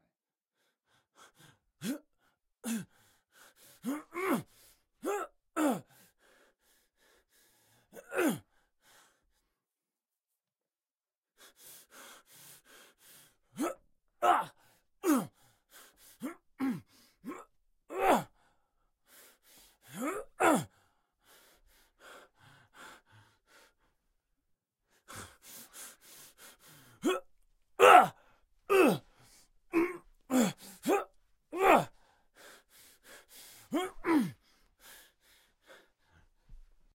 Fight Reaction Person 2
Male 35 yo
punches , pain, fighting, attack, hit, Angry breath.
aggression, angry, attack, breathing, fighting, hit, pain, punches